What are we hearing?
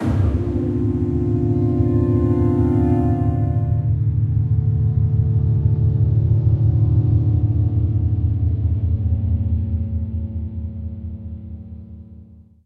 shakuhachi grave
shakuhachi processed sample remix
attack, transformation